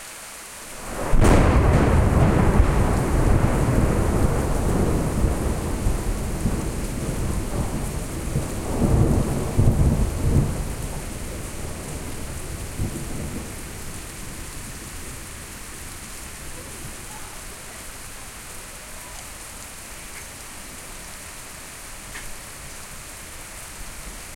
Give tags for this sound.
cats-and-dogs
thunder
lightning
crack
deep
splash
rain
boom
rumble
pour
water
loud
bass
pitter-patter

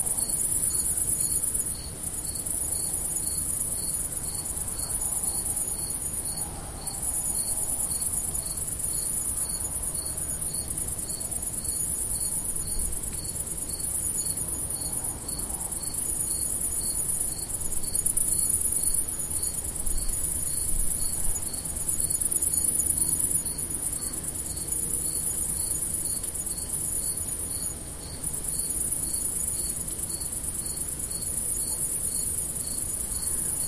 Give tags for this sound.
ambience
night
crickets
field-recording

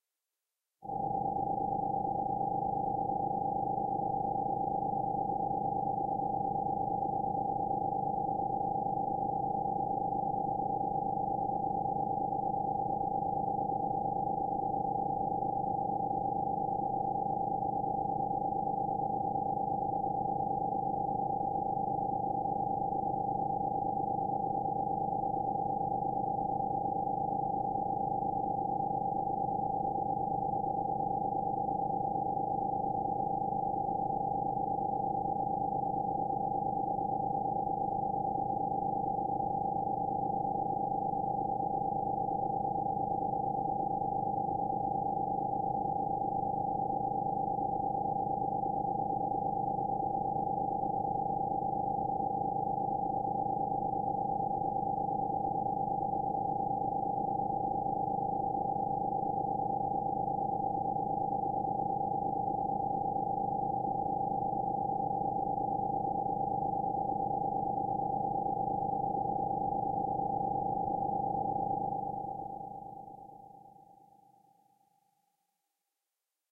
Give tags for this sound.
impulsion
energy
ambience
spaceship
futuristic
hover
starship

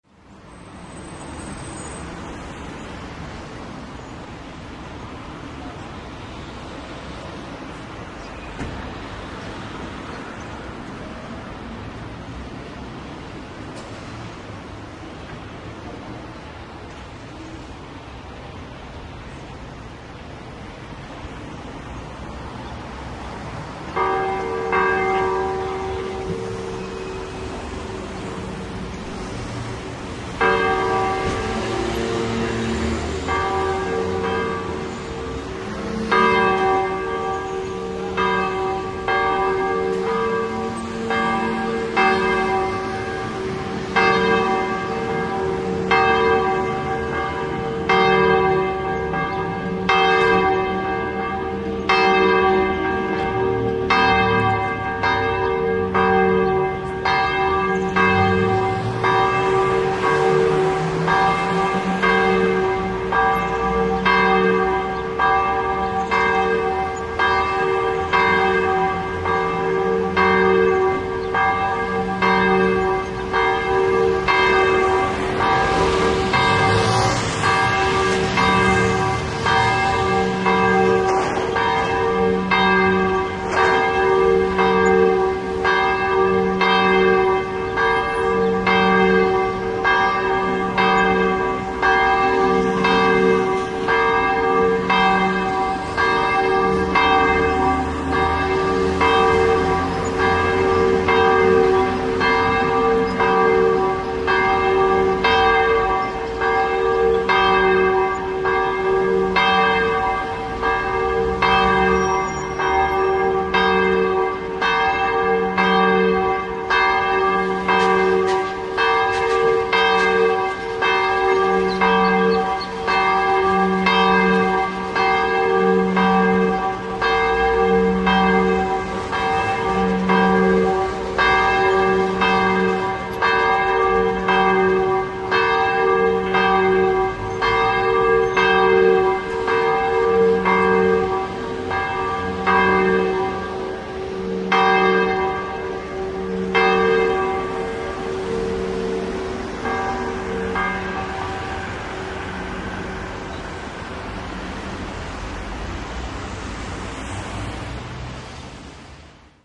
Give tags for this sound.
noise
noon

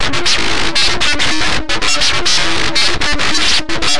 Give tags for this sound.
loop
sequence
noise

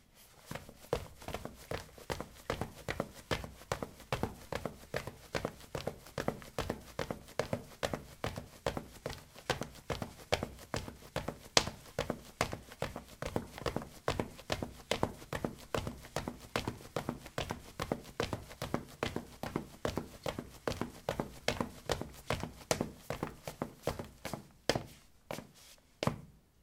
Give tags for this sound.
footstep
steps

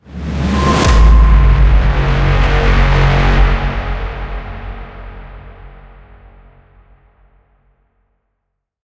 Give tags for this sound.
cinematic
dramatic
film
fx
hit
impact
movie
sci-fi
sound-design
suspense
synth

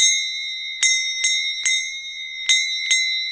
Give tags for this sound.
bhajan
world
kirtan
karatalas
ethnic
cymbals
india

